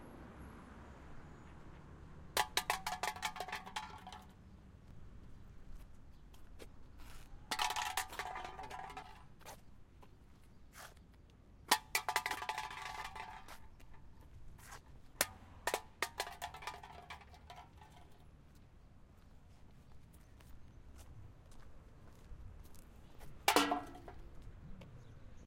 Hitting a coke can with my foot several times and then picking it up and throwing it into a garbage can. Recorded with a Sony PCM-D50.
bouncing, can, coke, hitting, kicking, metal